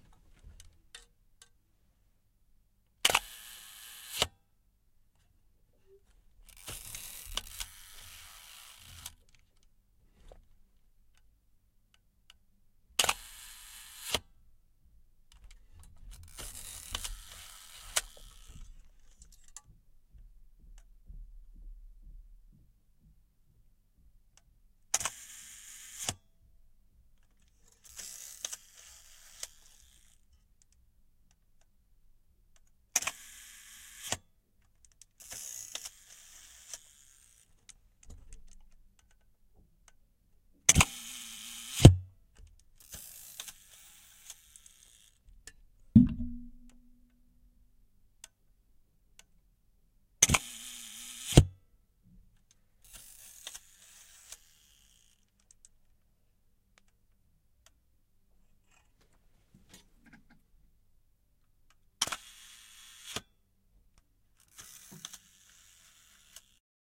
A medium format camera. Shutter release at 1 second.
camera, format, mechanic, medium, old, relase, shutter